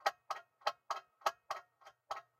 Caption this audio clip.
delphis CLOCK FX LOOP 3
clock record in a plastic box
bpm100, clock, delphis, fx, loop, tick, ticking, time